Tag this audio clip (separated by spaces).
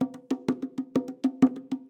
percussion loop drum bongo